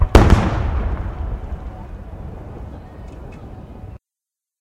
hit loud
recording of a firework explosion
ambience, distant, explosion, fire, fireworks, hit, loud, outside